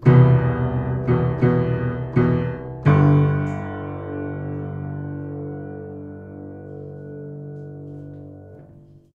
piano charge 6
Playing hard on the lower registers of an upright piano. Mics were about two feet away. Variations.
play-hard, piano, low-register, doom